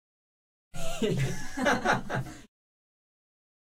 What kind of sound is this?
risas de varias personas